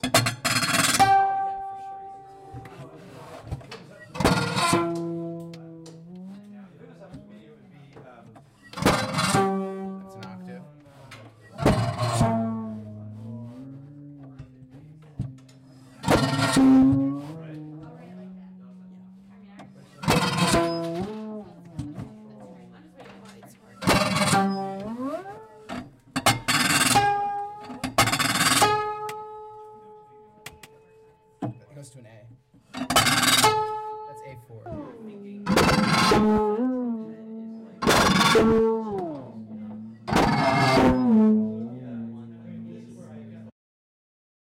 Constant Strum of Intonarumori
futuristic, intonarumori, load, turning